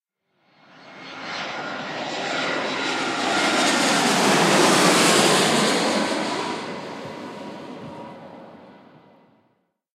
Plane Landing 06
Recorded at Birmingham Airport on a very windy day.